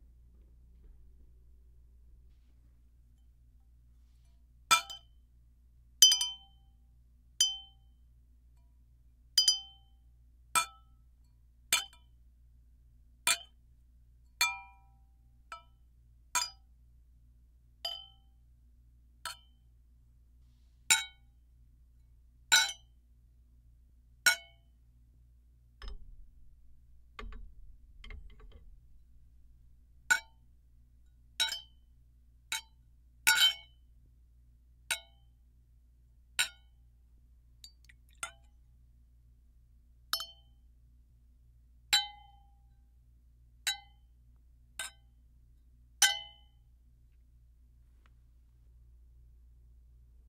Wine Glasses on contact (Clink)
Wine glasses partly full, assorted contact.
clink, glass, glasses, wine